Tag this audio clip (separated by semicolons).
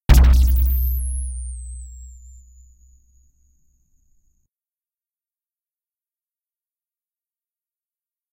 bounce; bouncy; jettison; rebound; springy; squelch; trampoline; wobble; wobbly